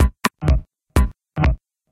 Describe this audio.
7 drumloops created with korg monotron @ recorded with ableton!
loop, minimal, tech